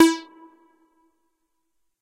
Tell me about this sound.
MOOG LEAD E
moog minitaur lead roland space echo
roland, minitaur, moog